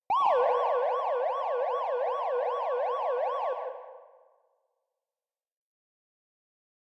Dub Siren Effect